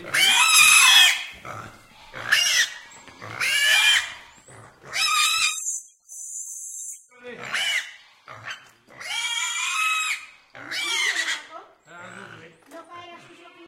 not for the faint of heart, young pigs' slaughter. Recorded in a country house's open yard near Cabra, S Spain. Sennheiser ME66 + MKH30, Shure FP24 preamp, Edirol R09 recorder. It was very hard for me to record this so I hope it's any use.
EDIT: I feel the need to clarify. This is the traditional way of killing the pig in Spanish (and many other countries) rural environment. It is based on bleeding (severance of the major blood vessels), which is not the norm in industrial slaughter houses nowadays. There stunning is applied previously to reduce suffering. I uploaded this to document a cruel traditional practice, for the sake of anthropological interest if you wish. Listeners can extract her/his own ethic/moral implications.